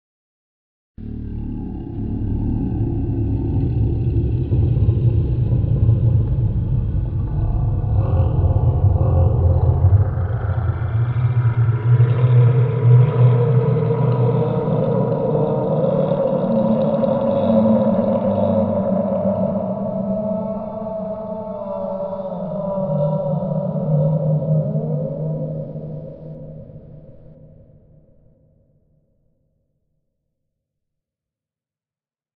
Distant monster Calling. Down-pitched human voice with delay and reverb.